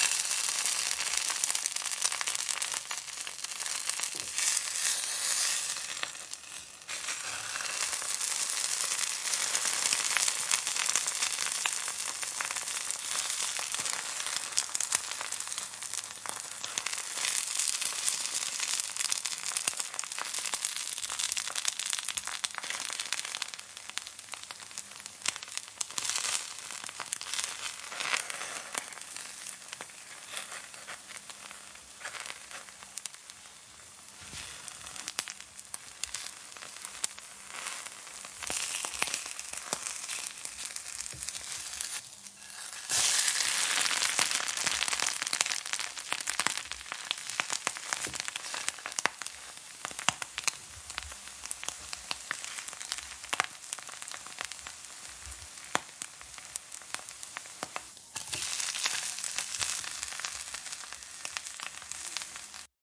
Fry an egg...